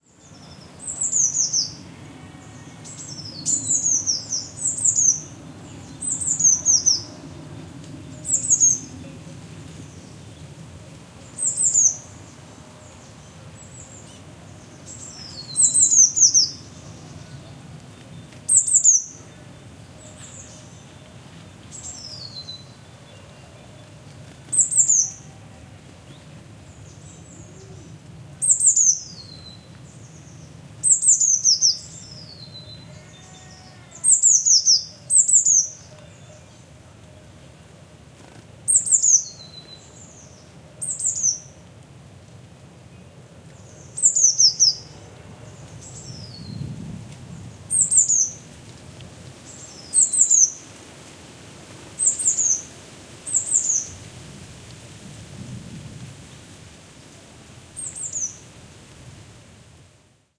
One little bird sings during a warm winter morning. Recorded near Aceña de la Borrega (Extremadura, Spain) using Audiotechnica BP4025 into Sound Devices Mixpre-3.